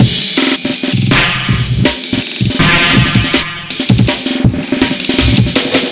A breakbeat with FX 162bpm. programed using Reason 3.0 and Cut using Recycle 2.1.